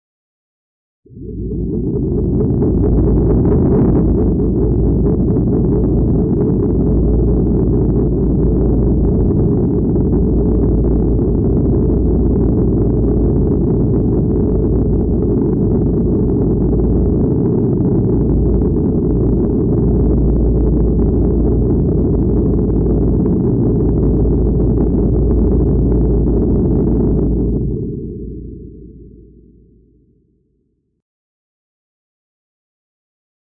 Into the Sun
some weird noise maybe the sound of a sun
Reaktor, random OSC´s and FM Gen´s messing with each other
noise, sun, distort, deep, heavy, bass, reaktor, artificial, grunge, gravity